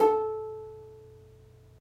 Notes from ukulele recorded in the shower far-miced from the other side of the bathroom with Sony-PCMD50. See my other sample packs for the close-mic version. The intention is to mix and match the two as you see fit. Note that these were separate recordings and will not entirely match.
These files are left raw and real. Watch out for a resonance around 300-330hz.